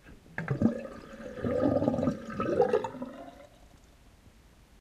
Mono recording of water falling from an opened tap into the sink. See the others in the sample pack for pitch-processed.
water, pitched, strange, slow, sink